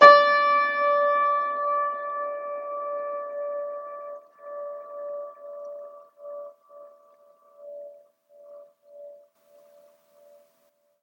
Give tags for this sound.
complete keys notes old piano reverb sustain

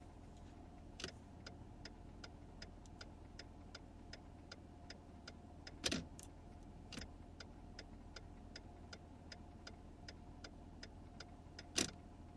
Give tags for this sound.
left-right turning-signal

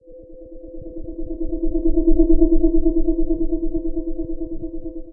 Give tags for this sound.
Alien-Vehicle Ascending Science-Fiction Sci-Fi Spacecraft UFO

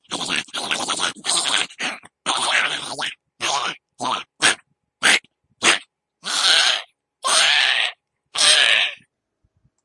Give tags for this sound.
vicious imp creature snarl gnaw nom bite

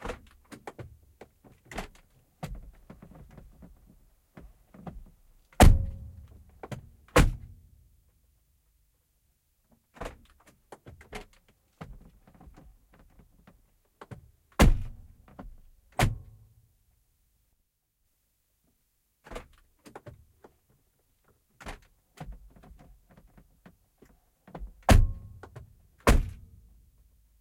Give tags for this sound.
auto car door field-recording finnish-broadcasting-company Mecedes-Benz ovi

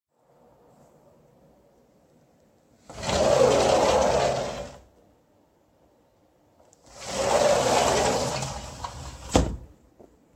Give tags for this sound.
glass-door household doors open closing door close sliding-door opening

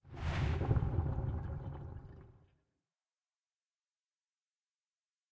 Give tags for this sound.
swoosh swish bubbles movement underwater submarine

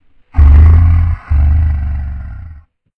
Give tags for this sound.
beast creature Demon growl grunt horror monster zombie

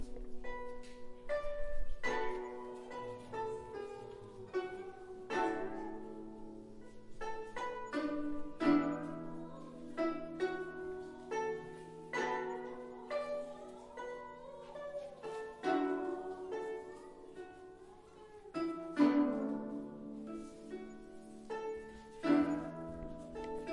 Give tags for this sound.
live concert voice music guzheng

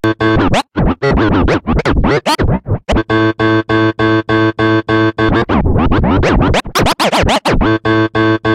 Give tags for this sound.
scratch synthetic vinyl

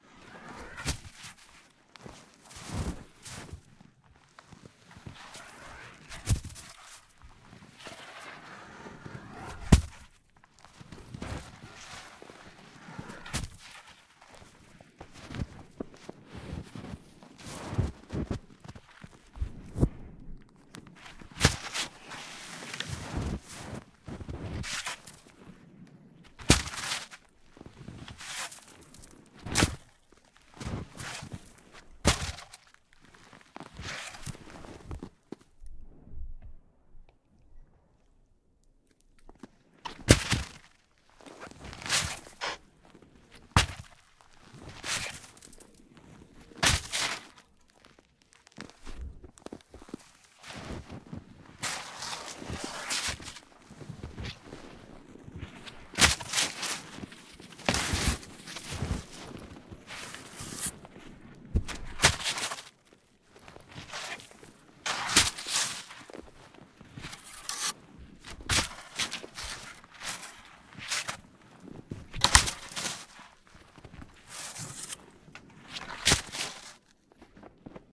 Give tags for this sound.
drop pick log branch wood snow up

